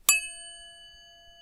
Aluminium platter
That's how it sounds when you use an aluminum platter as a triangle.
Bing, HDD, Platter